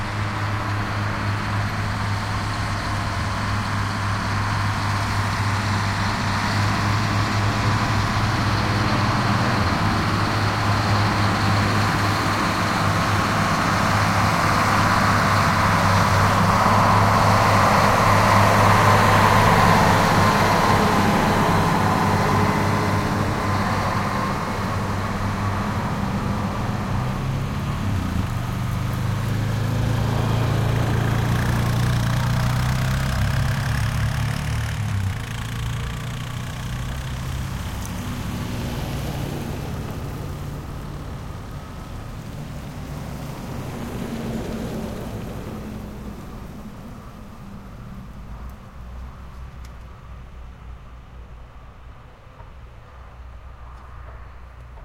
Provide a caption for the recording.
front loader truck with container drive by in reverse +pickup trucks follow